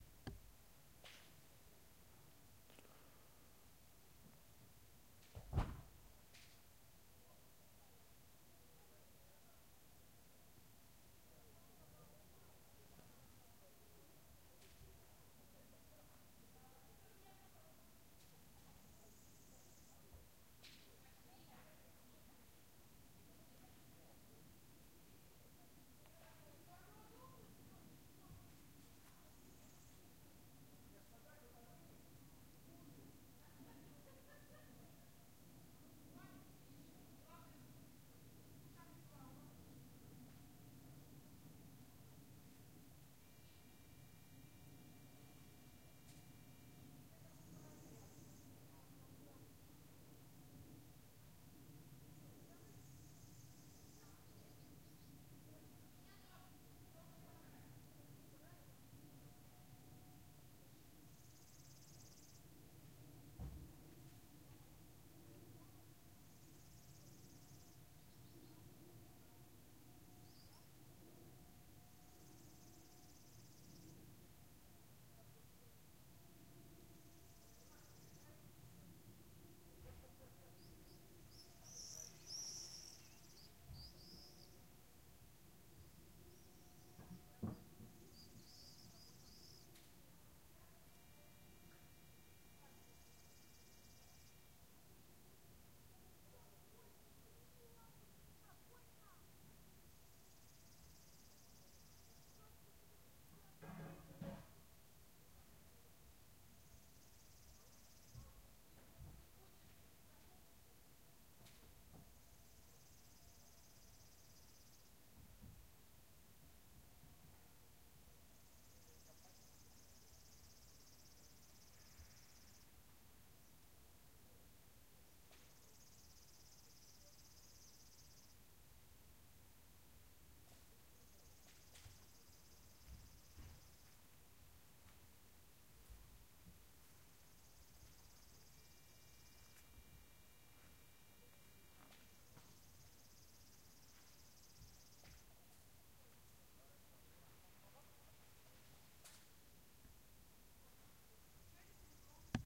Very quiet village evening ambience 4, B747 plane on 10000m
This sound recorded in a small village near Yaroslavl in Russia. Very quiet evening ambience, swifts are flying nearby, also you can hear a B747 plane flying on 10000m (checked via FlightRadar24). Russian talking on the backside. А little bit sounds from sauna.